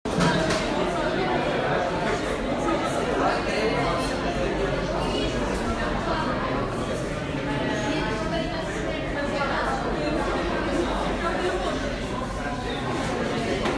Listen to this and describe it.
University Cafeteria ambience
Cafeteria, background, university, students